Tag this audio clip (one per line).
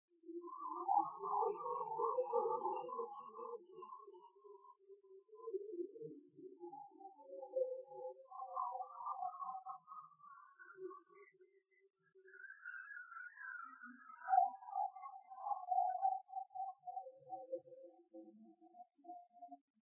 ambient
space